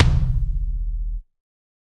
Kick Of God Wet 020
drum, drumset, god, kick, kit, pack, realistic, set